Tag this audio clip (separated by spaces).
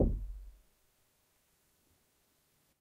door percussive percussion knock wood wooden hit closed tap bang